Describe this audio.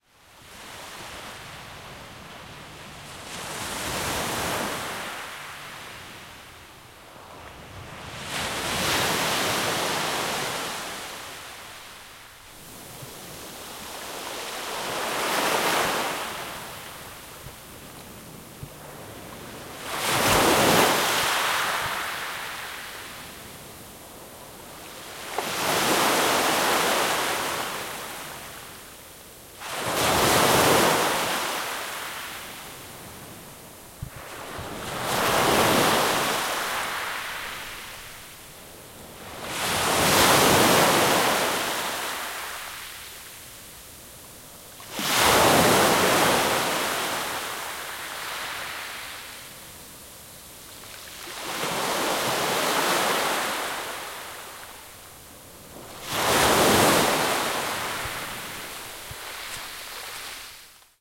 NB beach medium waves bayFundy MS
Medium perspective of rocky shoreline Bay of Fundy, Irving Nature Park, St John New brunswick. Mid summer. This is the back pair (MS) of a quad recorded with H2
bay, shore, surf, ocean, beach, rocky, waves, crashing